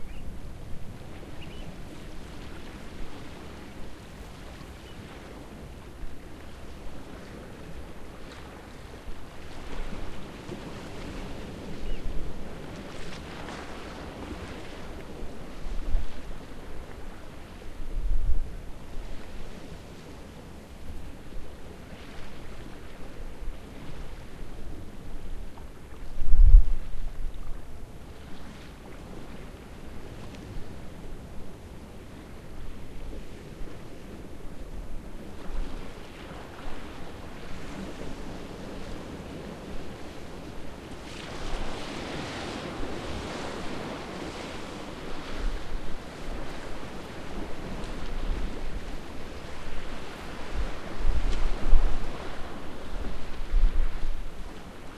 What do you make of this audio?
Orkney, Brough of Birsay B
Field recording on the Brough on a relatively windless day ..
birds, birsay, orkney, scotland, sea